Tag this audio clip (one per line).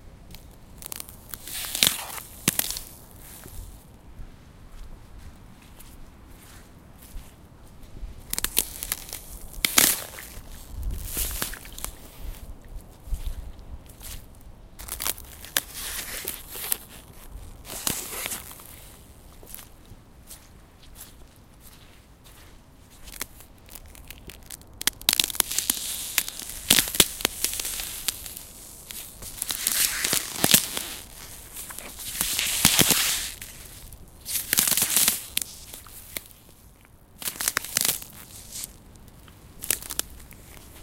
bones
cold
cracking
ice
slush
water